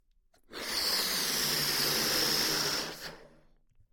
Balloon Inflate 1
Recorded as part of a collection of sounds created by manipulating a balloon.
Balloon, Blow, Breath, Inflate, Machine, Plane, Soar